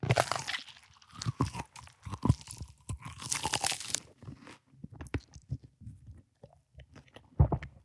monster bite
this sound can be used in a horror or a creature scene. its perfect for a giant creature
horror, bite, eating, giant, monster, creature, squish, crunch